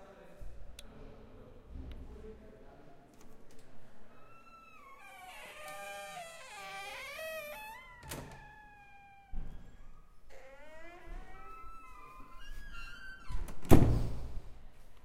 door squeaky
This is a sound of opening and closing a creaking door. The microphone was kept close to the ground, so the handle's noise isn't included in this recording.
door, wooden, old, close, closing, wood, lock, creak, noise, open, gate, slam, shut, handle, squeak, squeaky